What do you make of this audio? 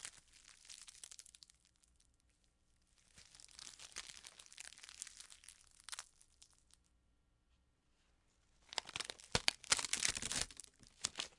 crujir de una hoja.